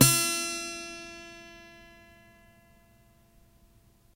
student guitar twang b
Plucked open strings at bridge on an acoustic small scale guitar, recorded direct to laptop with USB microphone.